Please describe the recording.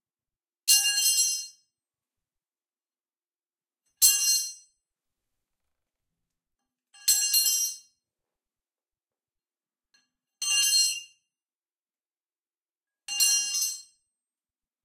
42 Wrench on concrete floor, indoor. 5 impacts
Dropping a 42 wrench on a concrete floor.
RAW file
Recorder: Zoom H6 with XY capsuel